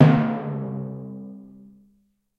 Failure Drum Sound Effect 2
A simple "failure" sound using a timpani drum with a pitch change on a music-making program called Finale. Enjoy!
error, fail, drum, funny, game-over, humorous, failure, sound, negative, cartoon, video-game, wrong, mistake